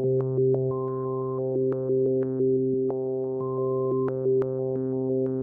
Just a small thing using a keyboard and reason.
ambient, background, electronic, keyboard, rhythm